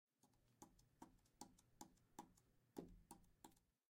Buttons being touched/manipulated.